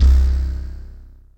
00 Eqx Beezlfs C1
Mapped multisampled patch created with synthesizer Equinox.